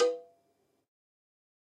MEDIUM COWBELL OF GOD 007
cowbell, drum, god, kit, more, pack, real